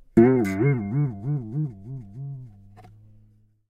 A pack of some funny sounds I got with an old toy guitar that I found in the office :) Hope this is useful for someone.
Gear: toy guitar, Behringer B1, cheap stand, Presonus TubePRE, M-Audio Audiophile delta 2496.